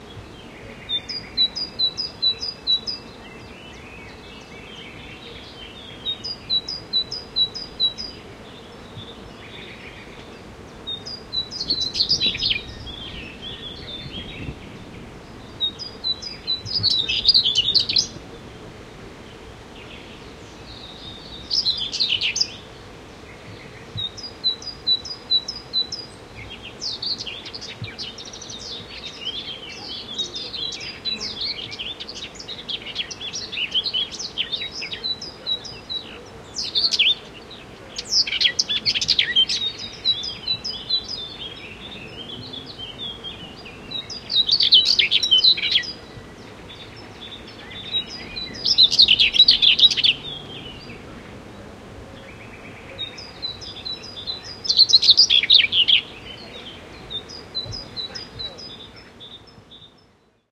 SE ATMO birds meadow distant people
ambience, atmosphere, birds, field-recording, meadow